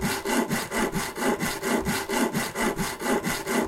Quite simple sound of sawing wood made with a twine. Recorded with H1 ZOOM.